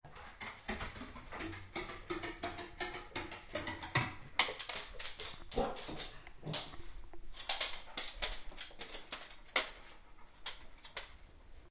Dog is walking up the stairs, making noises